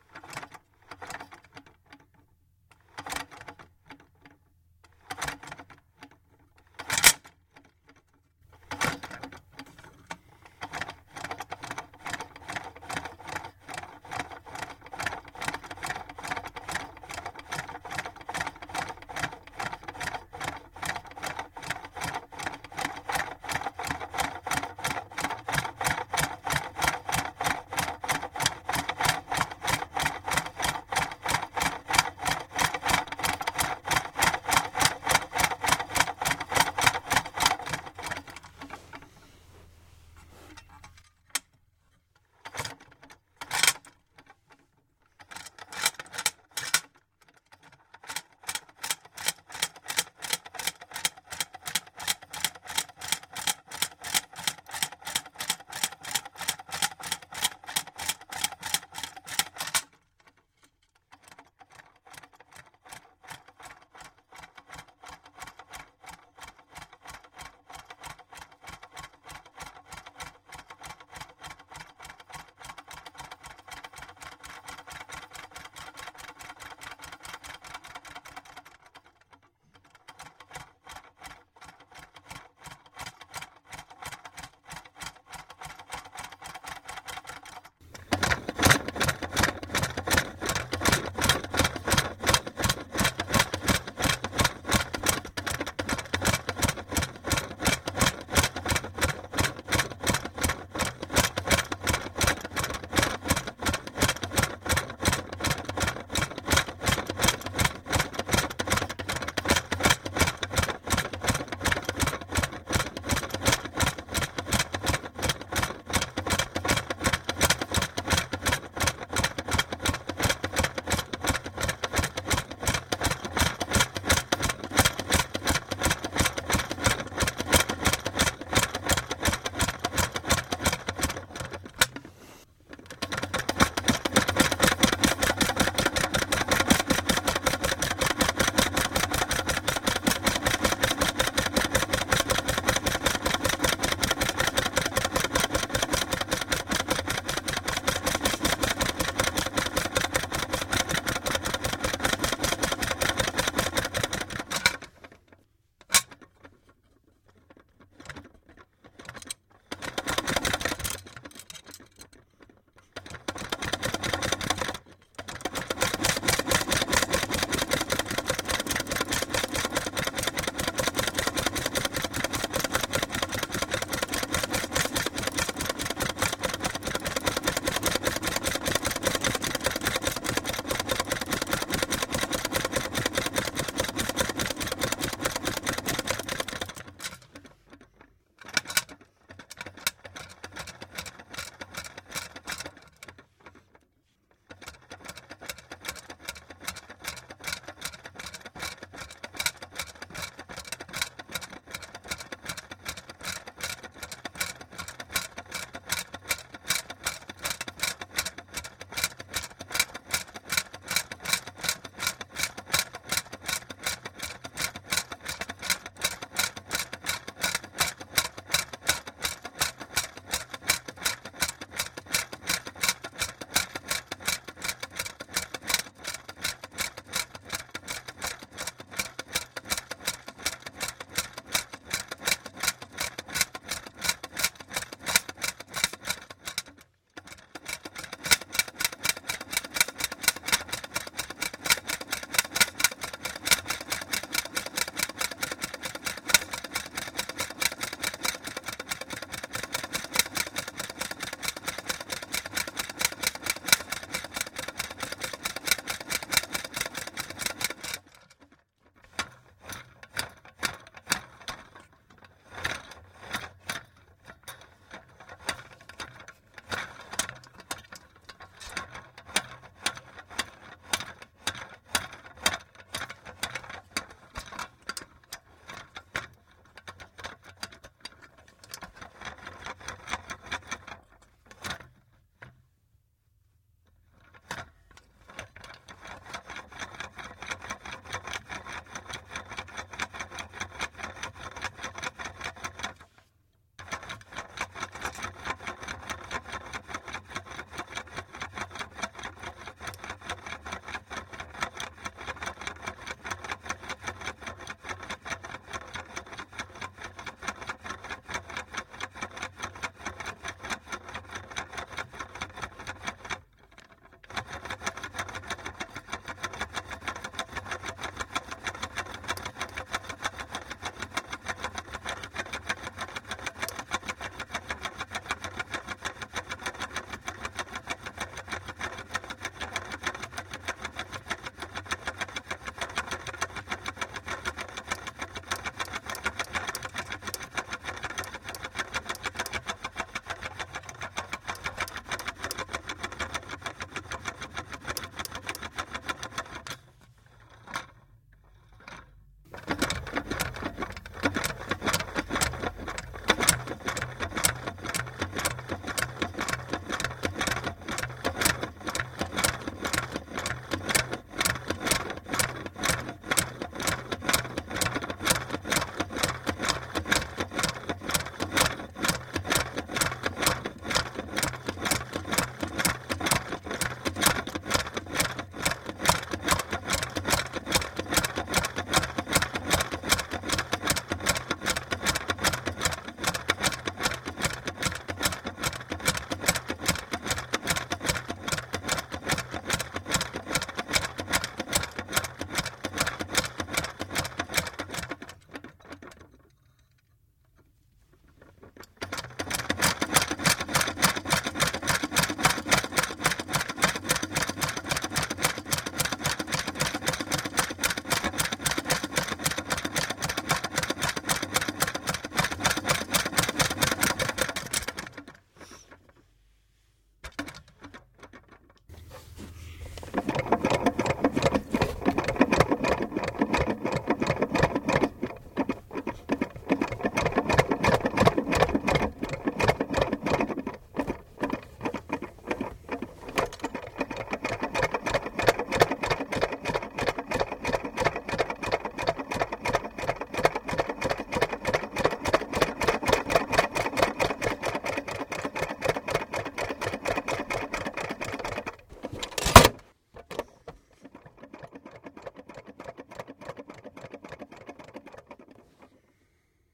Recently we purchased a scale from IKEA, when we finally got around to using it we realized that it must be off, as I do not weigh 240 lbs. As we did not have a receipt, or the desire to drive 2 hours to return a $10 scale the only course of action left to me was to take the damn thing apart and record its insides. (What else?)
I recorded and edited together around 7 and a half minutes of various configurations and performances of me making some sort of repetitive, engine-like sound. I can foresee myself using these sounds for an old-timey engine or car .
engine, model-t, cheap